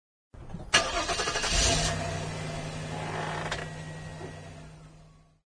J2 Engine Start
start the car's engine
car, start, engine